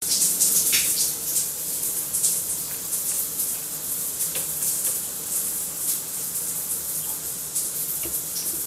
Shower running lightly
A sound effect of shower water running lightly
drip, dripping, drops, light, lightly, rain, running, shower, showering, water, wet